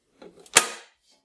Fuze Switch Flick
Flipping a fuse switch
button, Flick, Fuze, metallic, click, Fuse, Switch